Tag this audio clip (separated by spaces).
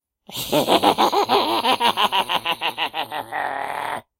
crazy creature demented evil goblin imp insane laugh laughter mad male monster psychotic